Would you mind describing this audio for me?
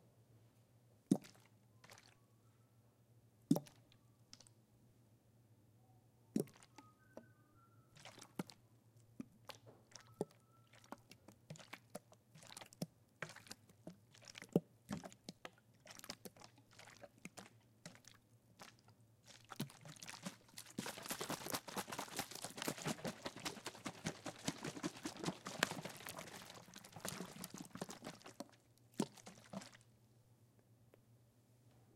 botella de agua 01
botella de agua - water bottle
1, agua, botella, bottle, de, pour, water